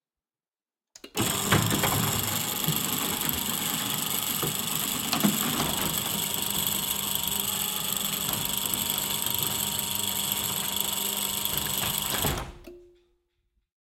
Electronic Gate Close 01
machine, engine, gate, start, motor